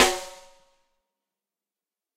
Ludwig Snare Drum Rim Shot
Drum, Ludwig, Rim, Shot, Snare